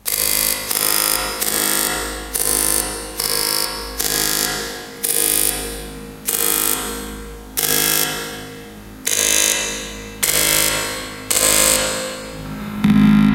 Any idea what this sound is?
random11 - electricity
buzz, electricity, random, raw, voltage